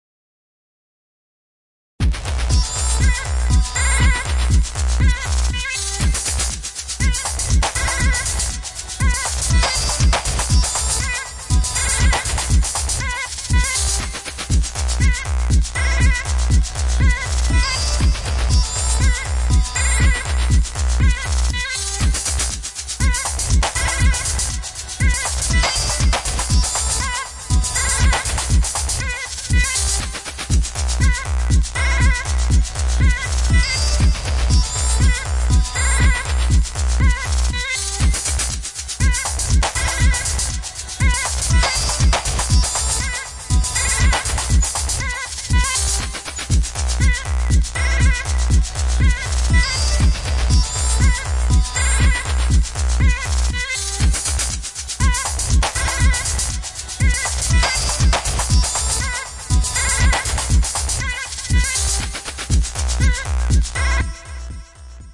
Sahara dance - Cinematic deep-house edm music beat
120-bpm, Cinematic, action, beat, dance, deep-house, edm, electronic, film, groovy, movie, music, percussion-loop, world